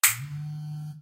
Turning on an electric toothbrush. Recorded onto HI-MD with an AT822 mic and processed.
buzz, snap, click, hum